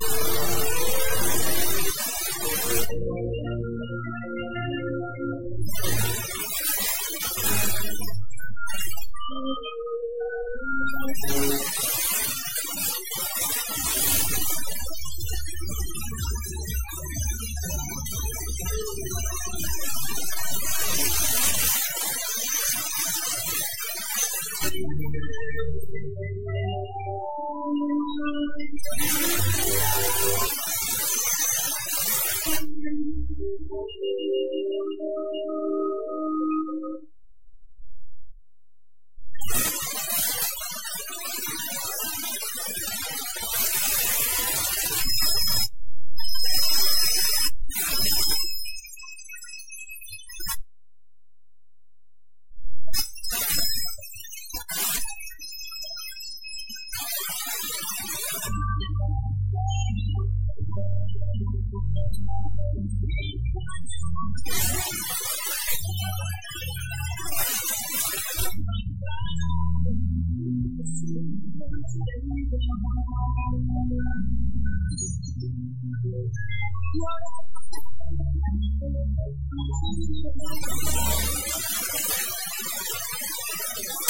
SDR Recording 003 NoiseRemoved
So I wanted to test same method by myself. Thanks AlienXXX, I didn't know that there is quite big difference in those noise reduction methods in Audacity versions.
I think there is quite nice sounds in this record after noise reduction :)
radio, abstract, strange, digital, noise, sdr, freaky, alien, electronic, sci-fi, bleep, noise-reduction, beep, processed